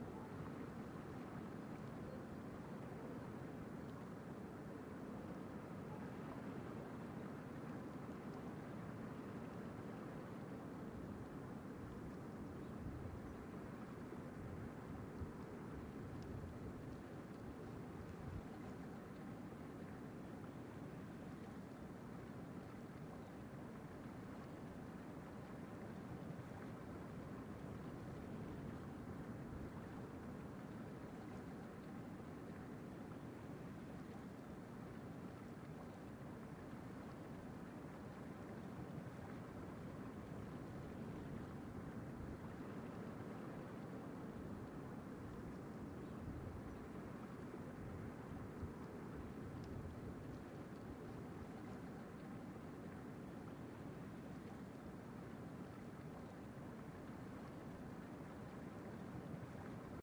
PENDON BEACH AB 2M
Short recordings made in an emblematic stretch of Galician coastline located in the province of A Coruña (Spain):The Coast of Dead
sea, beach, ocean, waves